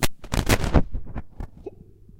Analogue Glitches
Analogue sounding rhythmic noisy glitch sounds.
Recorded with Sony TCD D10 PRO II & Sennheiser MD21U.
analogue, beat, click, crackle, crackling, glitch, glitches, idm, pop, rhythmic